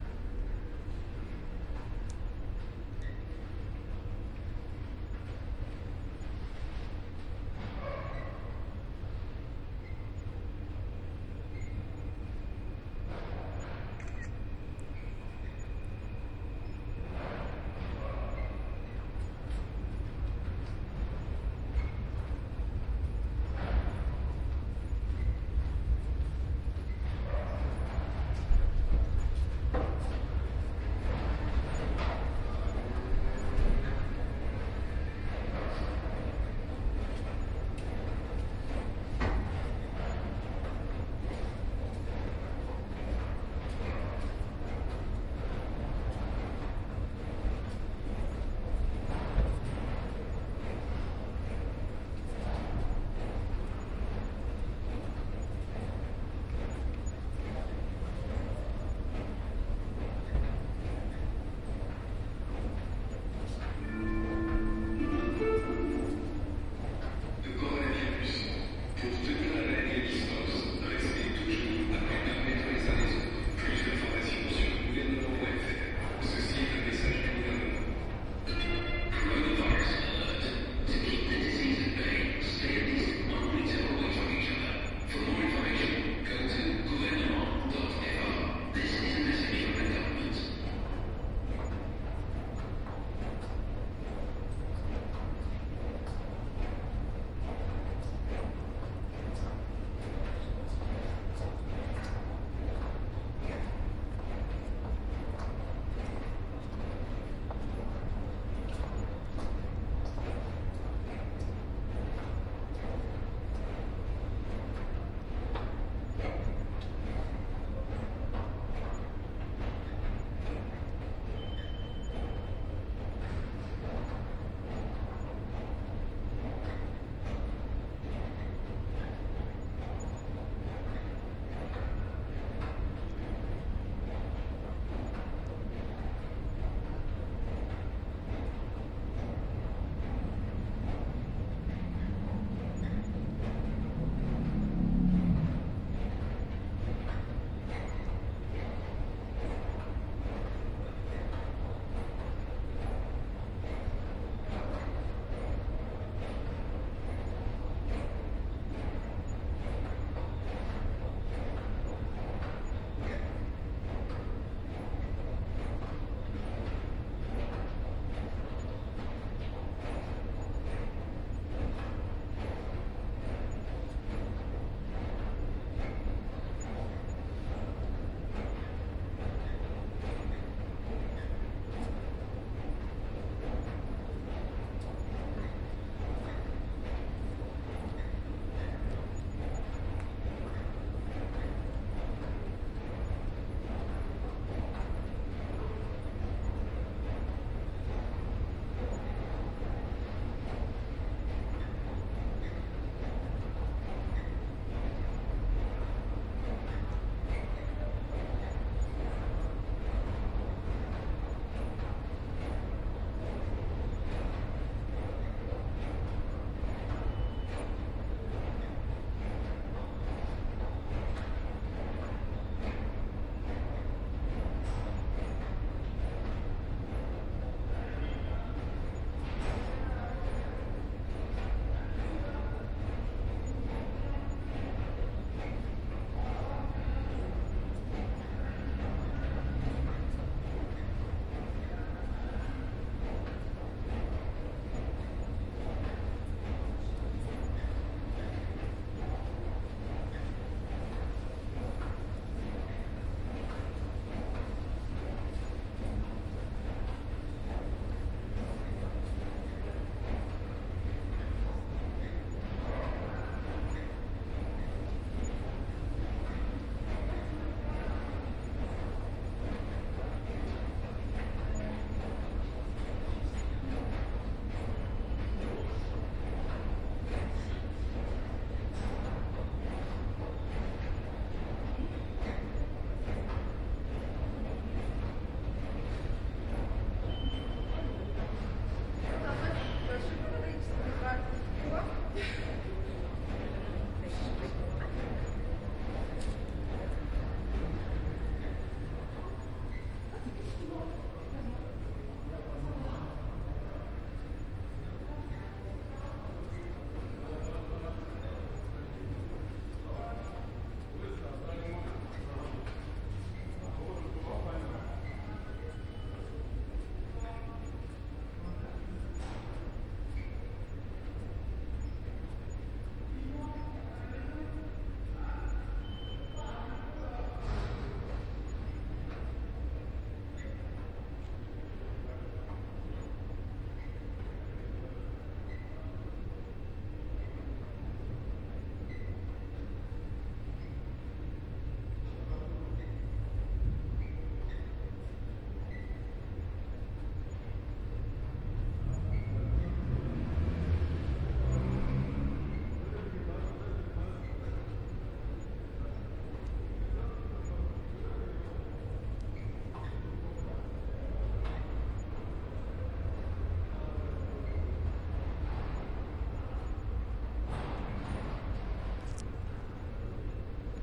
Paris Covid19 METRO SUBWAY Station empty
very empty metro station in Paris
announcements are done and very few people walking by, escalator is stopping at a certain point
n.b. this is a BINAURAL recording with my OKM soundman microphones placed inside my ears, so for headphone use only (for best results)
ambience, announcement, atmosphere, confinement, ConfinementSoundscape, corona, covid19, empty-spaces, escalator, field-recording, loudspeaker, metro, paris, station, subway, underground